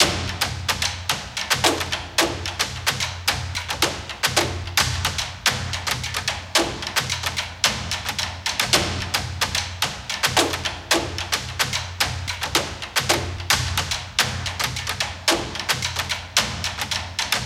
Action Percussion Ensemble Inspired from The Video Game "Tom Clancy's The Division".